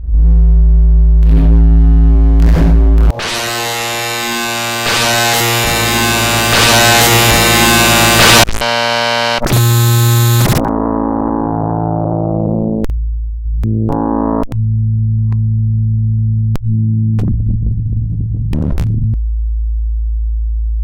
harmonic fun(half magnitude)
A singe-tone sine wave of less than
440 hertz and .71 decibels. Increased the amplitude
by using the equalizer, then increased the amplitude
again without clipping both times. The addition of
harmonics after first changing pitch in random
segments. The pitch is changed then as is the
volume or amplitude.